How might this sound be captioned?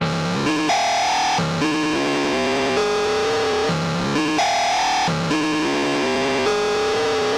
synth loop made with mr. alias pro sequenced in Renoise
hardcore, extreme, distorted, alias, noise, digital, glitch, synth